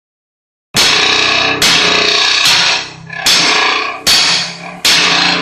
Demolition site metal pipe in concrete hole + Gravity.